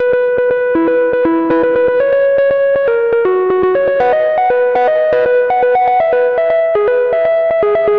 a happy little 8 bar loop
120bpm, gaming, happy, loop, synth, synthesiser